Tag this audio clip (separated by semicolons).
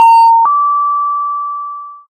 call; calling; calls; hospital; house; machine; office; ring